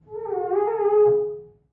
Recording the procedure of cleaning a mirror inside an ordinary bathroom.
The recording took place inside a typical bathroom in Ilmenau, Germany.
Recording Technique : M/S, placed 2 meters away from the mirror. In addition to this, a towel was placed in front of the microphone. Finally an elevation of more or less 30 degrees was used.